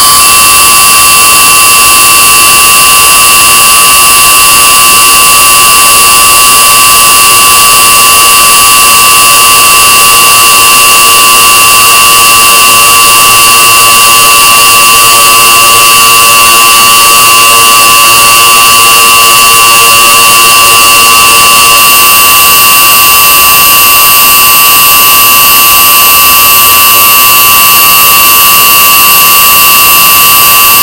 Made by importing misc files into audacity as raw data.
ouch, waow